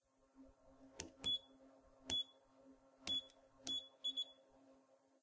Maquina botones
That's the sound of the electric balance in a butcher. Recorded with a Zoom H2.